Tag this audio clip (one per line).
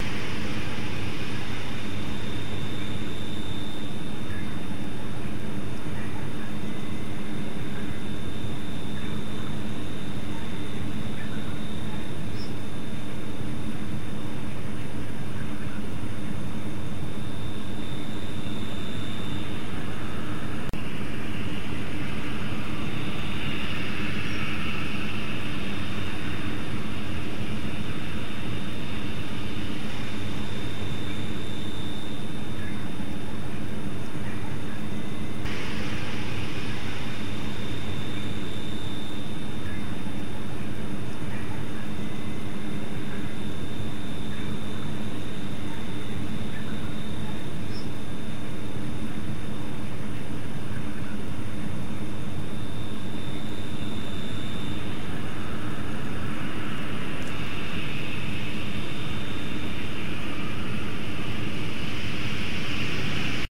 trees
cosmic
moon
nature
night
locust
spaceship
sound